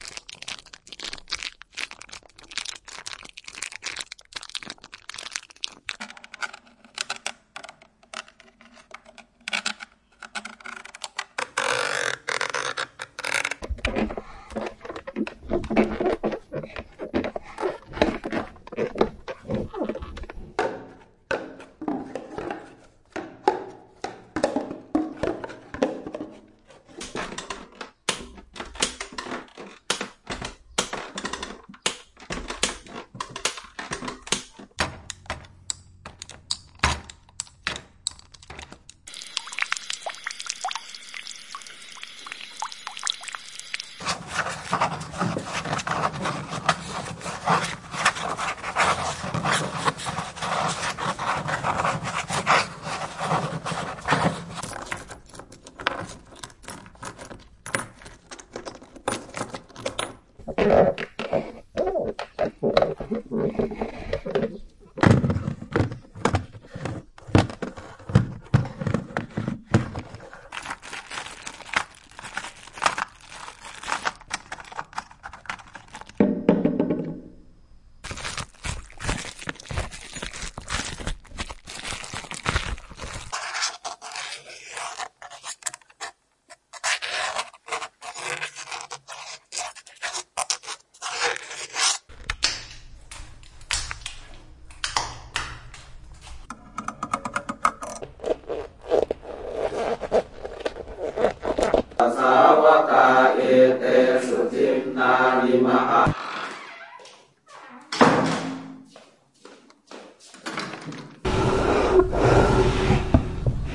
Formatted for use in the Make Noise Morphagene eurorack module. These recordings i made with a Sony PCM M10 around my house in Thailand. They concentrate on the percussive hits, scrapes, cuts, door slams, creaks, water drops and clicks.
foundsounds, abstract, glitch, hits, scrapes, modular, musiqueconcrete, morphagene, eurorack, modularsynth, experimental, cuts